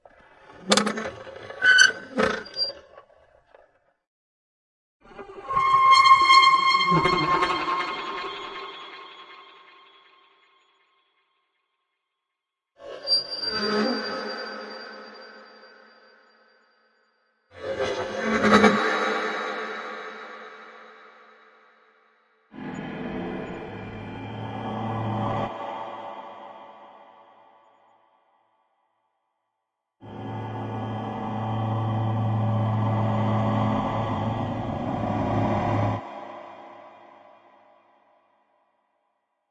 Creepy/Eerie/Horror
Free!
A recording of an old coffee grinder. It begins with the raw recording which then moves into more creepy and eerie aesthetics and design examples. Easy to cut up and fade off the reverb tail.
Perfect for psychological/horror/thriller games or animations, cut scenes or flashbacks of live action productions.